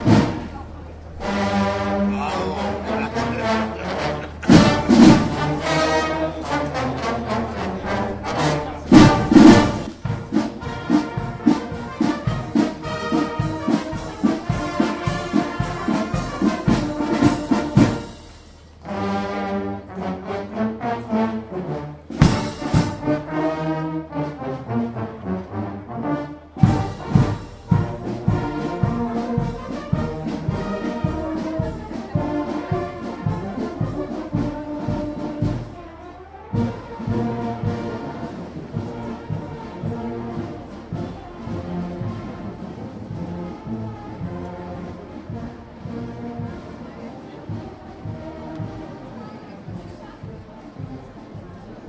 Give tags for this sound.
band
minden

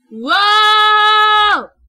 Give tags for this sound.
regular
voice
show

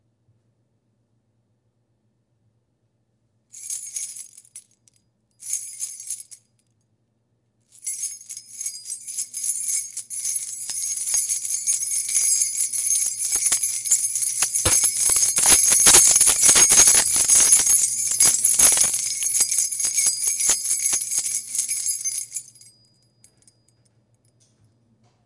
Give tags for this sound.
noise
strange
weird

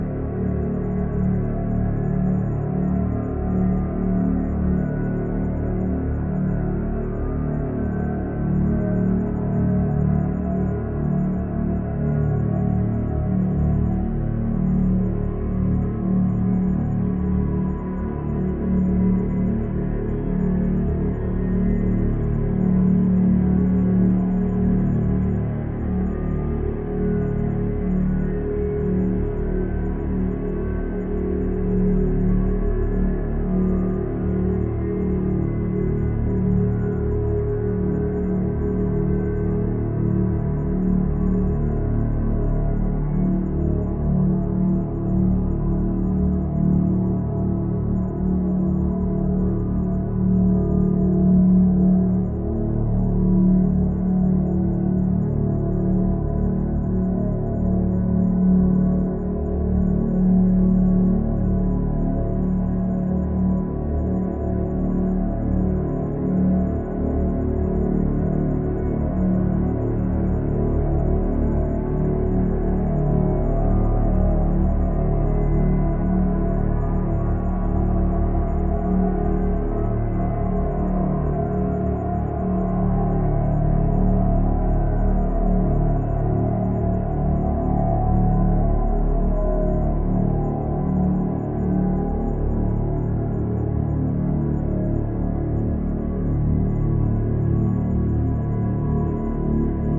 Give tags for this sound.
bass
drone
metalic

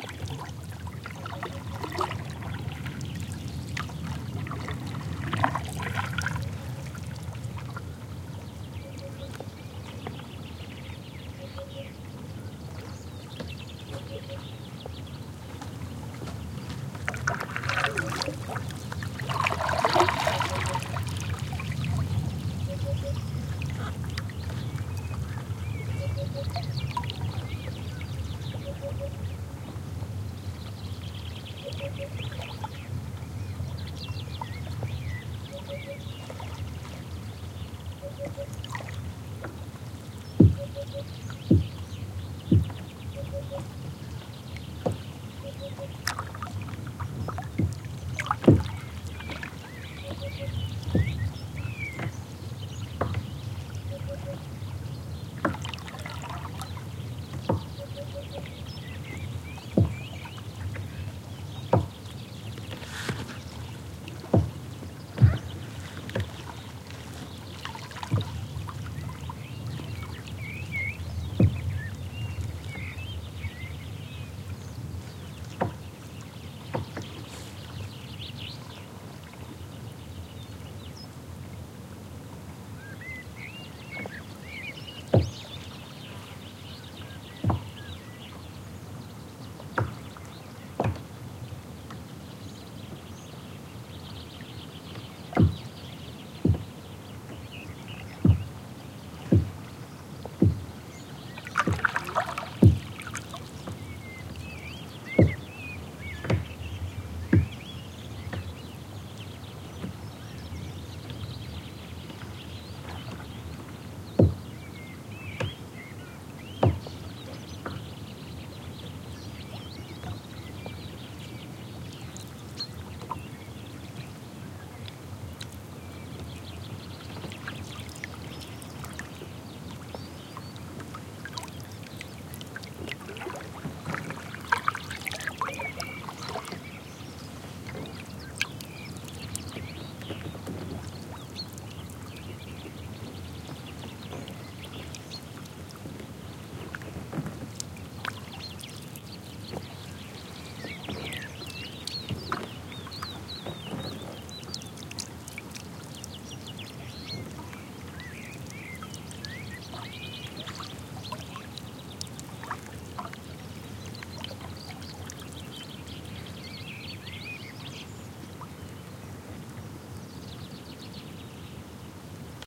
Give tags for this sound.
birds
boat
canoe
countryside
field-recording
lake
nature
paddling
pond
south-spain
splash
spring
water

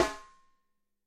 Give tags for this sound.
Snare
Drum
Shot
Rim
Ludwig

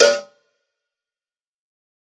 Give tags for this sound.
cowbell
dirty
drum
drumkit
pack
realistic
tonys

